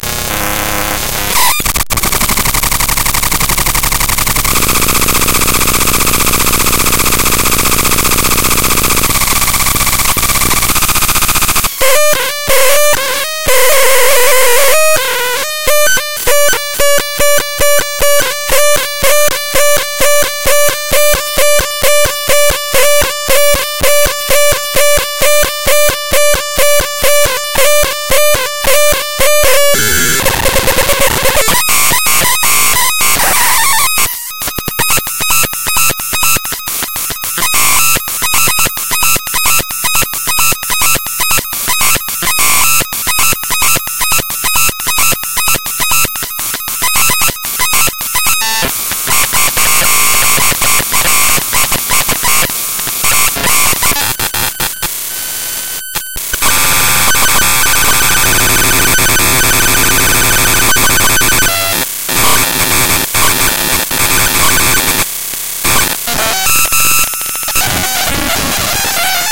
check your volume! Some of the sounds in this pack are loud and uncomfortable.
A collection of weird and sometimes frightening glitchy sounds and drones.
My computer had a complete meltdown. All I did was ask it the meaning of life.
Created in audacity by importing a a bmp into audacity as raw data.